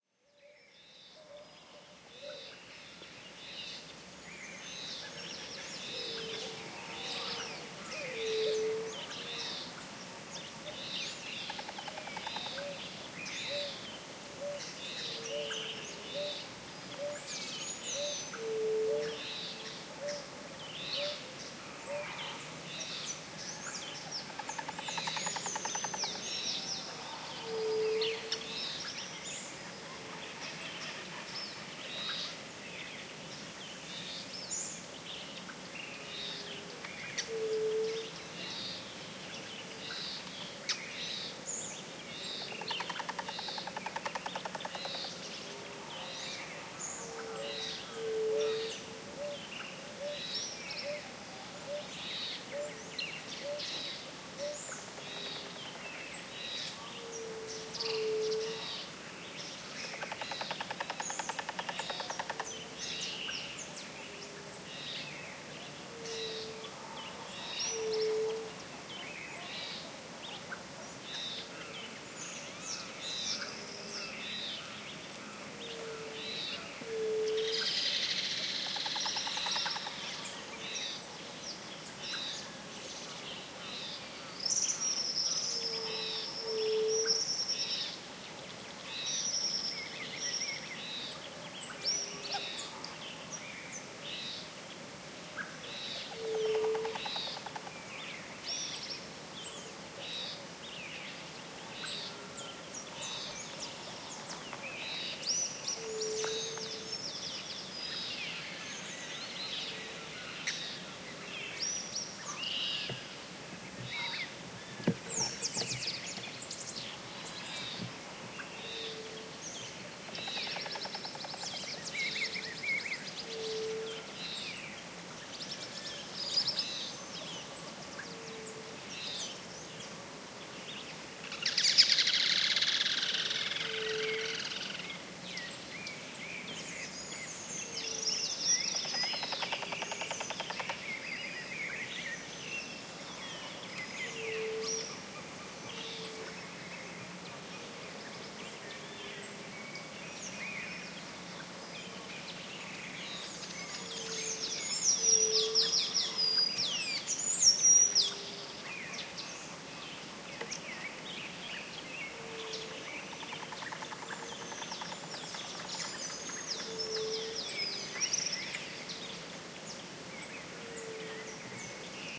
Early morning before sunrise on a big farm, so-called fazenda, in the Sao Paulo hinterland, near Campinas, Brazil. Song and calls of birds near the residential area of the farm, by a small pond. Waterfall noise in the background.